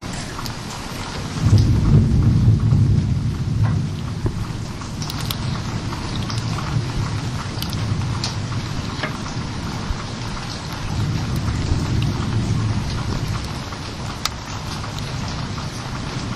rain and fire cracling thunder with water drips
anxious, drama, dramatic, ghost, Gothic, horror, rain, scary, sinister, spooky, suspense, terrifying, terror, thrill